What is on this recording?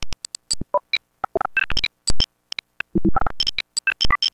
Really glitched out clicks and pops from a Nord Modular and other effects.
beep, click, digital, electribe, glitch, modular, noise, nord, pop, sound-design, synth